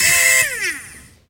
Toys-Borken RC Helicopter-11
The sound of a broken toy helicopter trying its best.
broken, buzz, gear, helicopter, machine, motor, toy, whir